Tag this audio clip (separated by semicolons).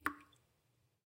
water; drip